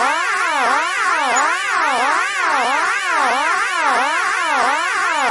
Stereo Wow Alarm Loop
Effect, Audio, Synth, Funny, Spooky, Dubstep, Background, Ambient, Weird, Noise, Dub, Electronic, Alien, Sound